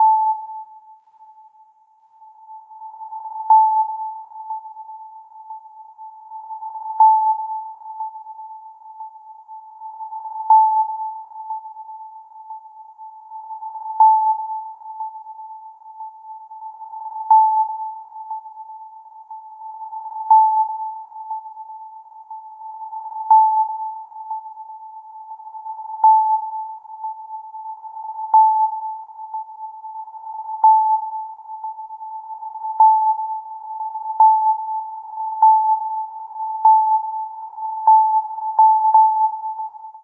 A sine wave with a reverb and delay, with a reverse-reverb underneath.
ping, sonar, sub, submarine